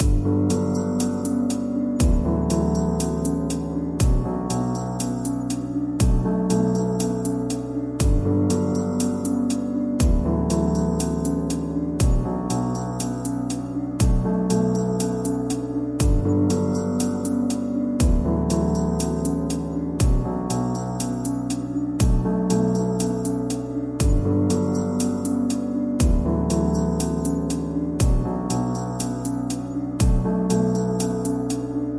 Piano and drums
A loop that I made in Ableton using piano with a delay and added a drum track.
loop, drums, Piano